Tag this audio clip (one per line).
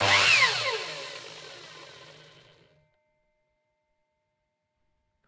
mitre
saw
dirty
kit
realistic
pack
raw
cut
drum
real
drumset